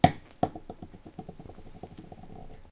This is from a library of sounds I call "PET Sounds", after the plastic material PET that's mainly used for water bottles. This library contains various sounds/loops created by using waste plastic in an attempt to give this noxious material at least some useful purpose by acoustically "upcycling" it.